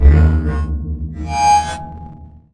Some of the glitch / ambient sounds that I've created.
electronic, glitch, idm, reaktor